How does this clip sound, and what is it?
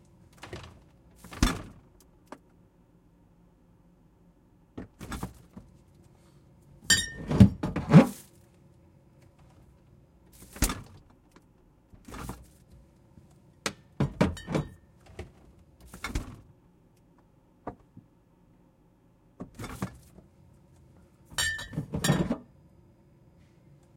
Open refrigerator fridge, rummage, bottles, food